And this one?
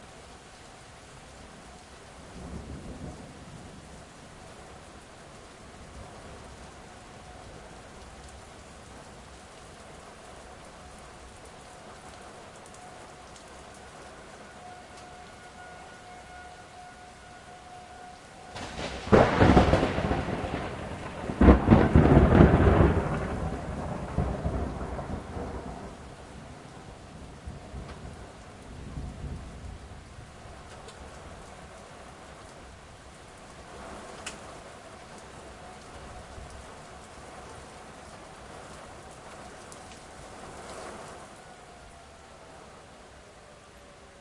siren n thunder
This has all the elements of a classic Texas thunderstorm: wind, rain, tornado sirens and a huge peal of thunder. Conditions were right, but no tornado touched down.
Recorded sometime in June of 2007 in Arlington Texas with a Sony ECM-99 stereo microphone to SonyMD (MZ-N707)